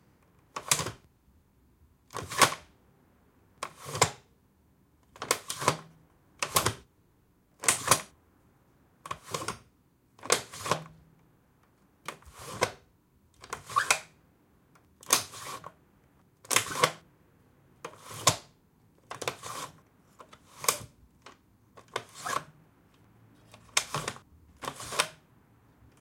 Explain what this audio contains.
Window Shutter, Small Lock, Wooden Frame With Glass Window, Open & Close.